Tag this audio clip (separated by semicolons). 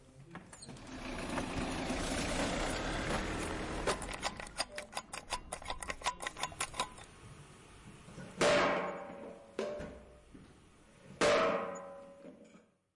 Sint; Sonic; Jans